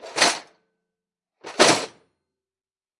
Tool-case - Open and close
Tool-case opened and closed.
1bar, 80bpm, close, metalwork, open, tool-case